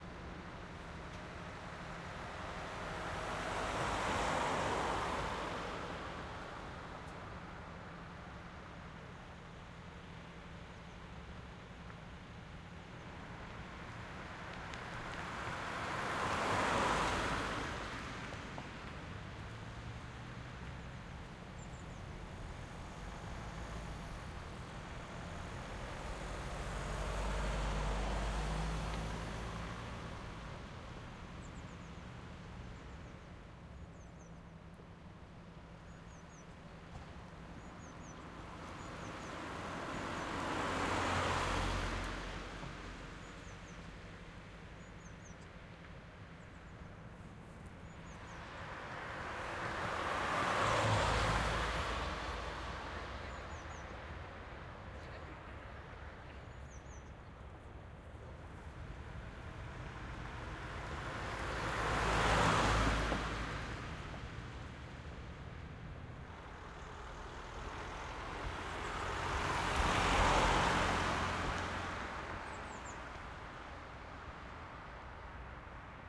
BG SaSc Car Passes Slow Speed Cars Pass Passing Berlin
Car Passes Slow Speed Cars Pass Passing Berlin
Berlin, Cars, Passes, Passing, Slow